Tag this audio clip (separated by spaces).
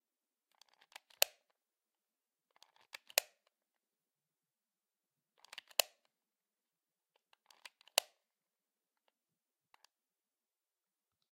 buttons clicks controller game keyboard playstation remote shoot xbox